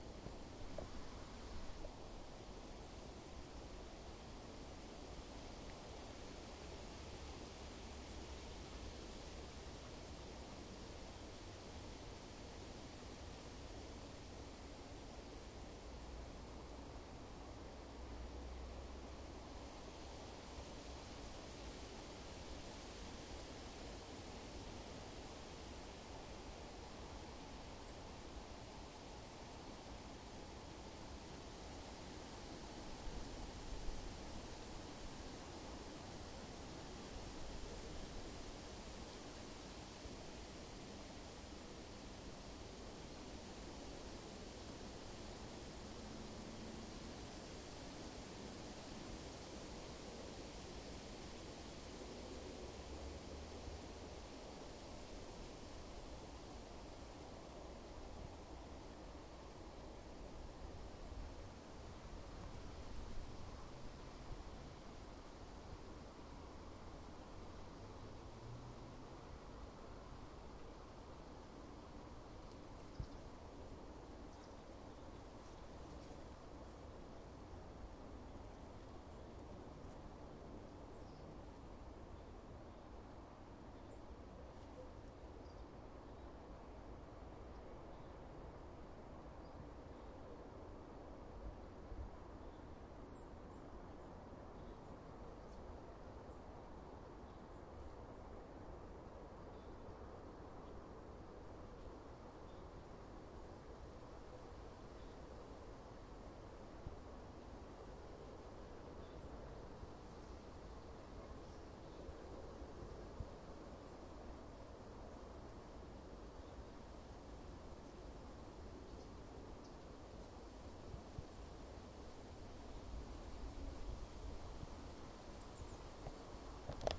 Forest Day Wind Roadhumm 02
A simple field recording of an autumn day in Tikkurila, Vantaa, Finland.